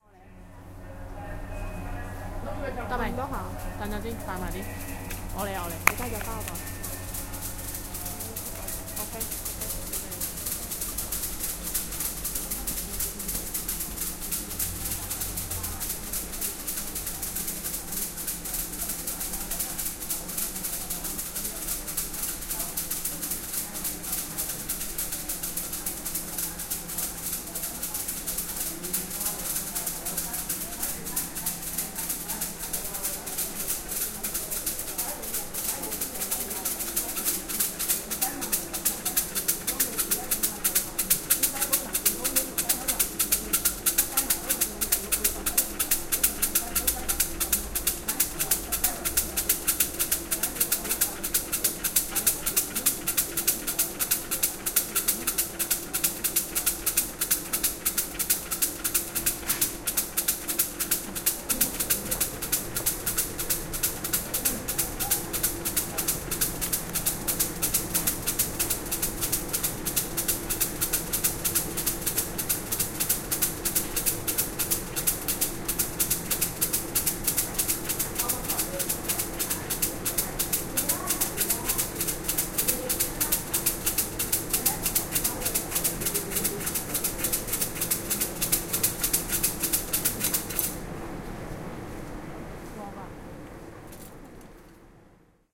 Ama Temple Ceremony with Omikuji
Field Recording for the Digital Audio Recording and Production Systems at the University of Saint Joseph - Macao, China.
The Students conducting the recording session were: Christy, Yan, Susana, Katrina, David.